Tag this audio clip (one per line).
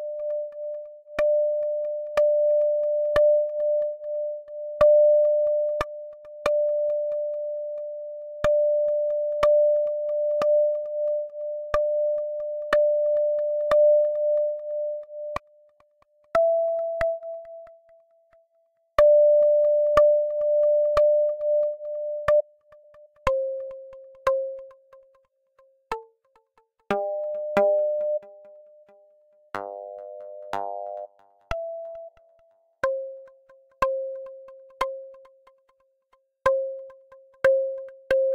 beat
kapling
minimal